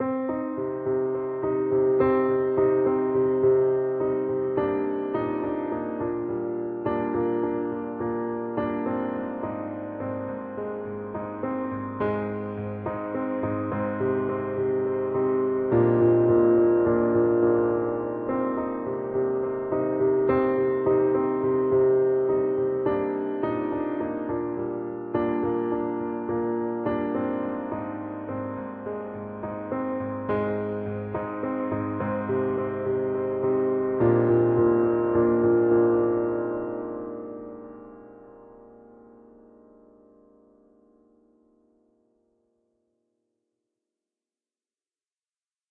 Created from sampled piano notes in music production software.
piano-tune, music, piano